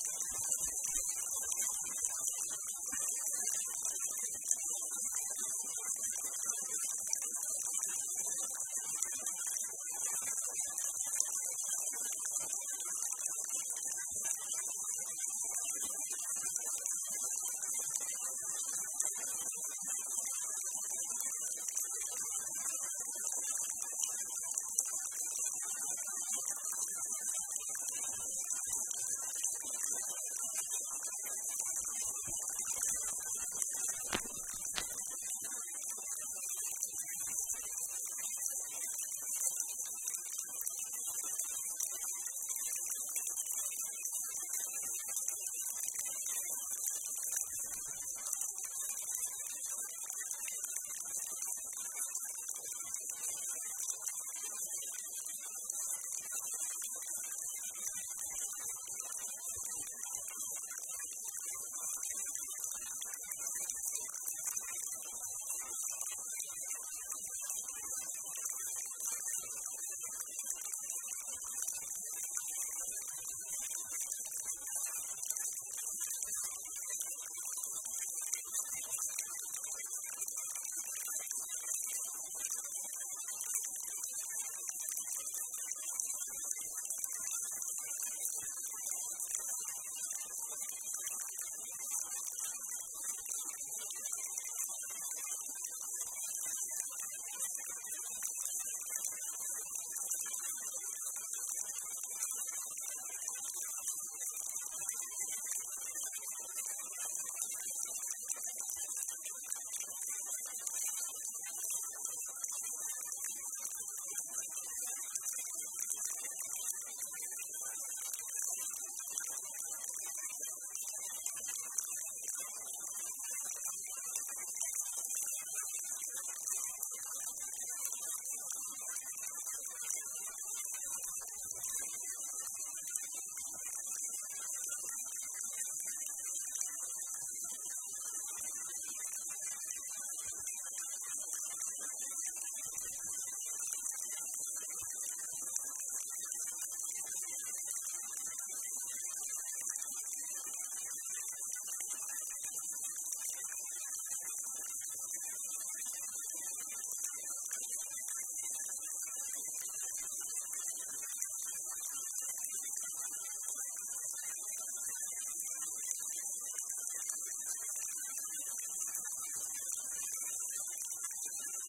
Recorded September 23, 2012. Creek water at low level. Used Shure PG-58 mic and Sony PCM-M10 recorder. Normalized to -3 dB.
creek, field-recording, running-water